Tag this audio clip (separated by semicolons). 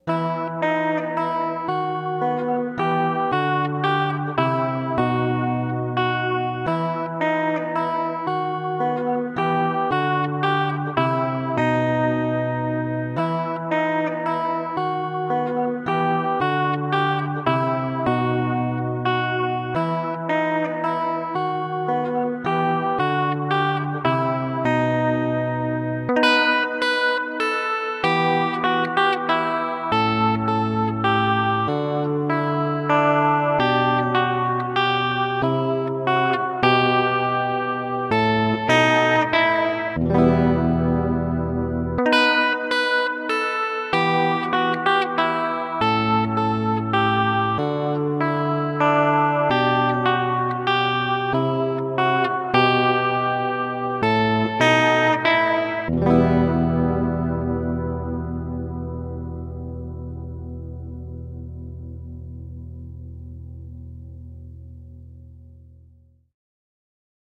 CLASSICAL; ELECTRIC; GUITAR